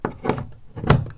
power point01
electricity, off, power, power-line, power-point, switch